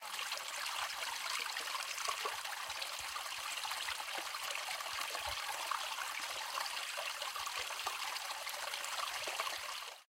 Small Creek/Brook
creek, water